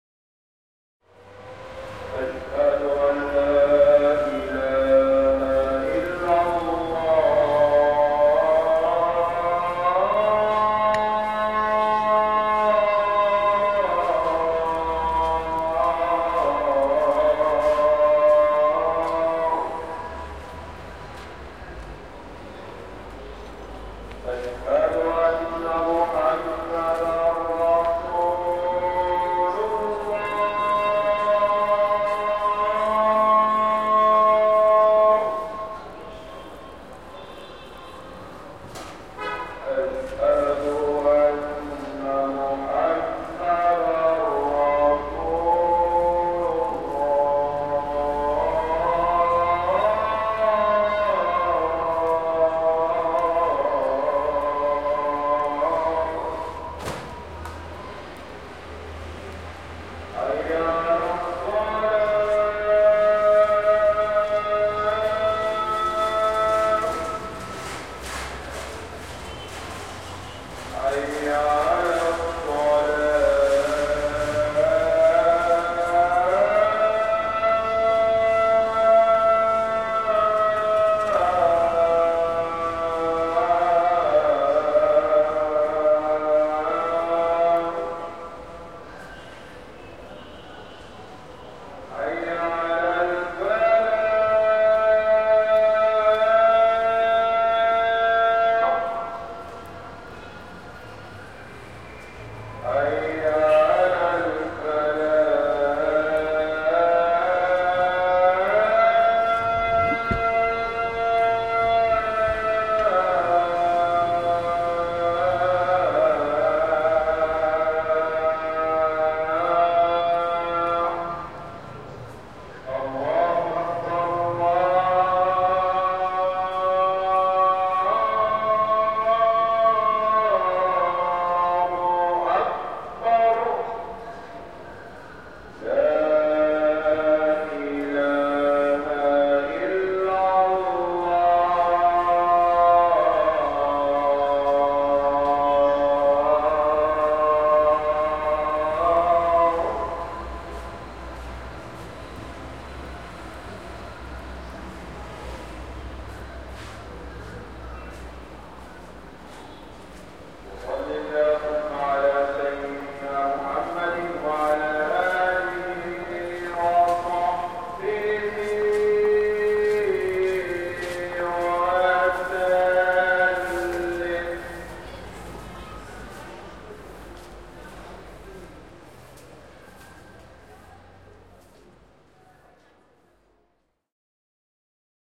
2014/11/22 - Alexandria, Egypt
Muezzin call from a close street.
Pedestrians. Traffic.
Beginning missing.
ORTF Couple
Call, Muezzin